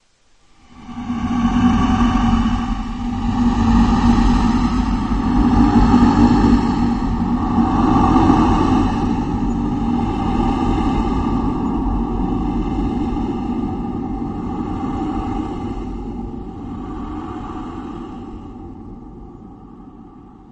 Ghostly Breathing
Would work great in a horror game or horror related project of some kind :D
monster, terror, spectre, phantom, fear, ghost, horror, fearful, sinister, haunted, scary, nightmare, evil, demon, creepy, spooky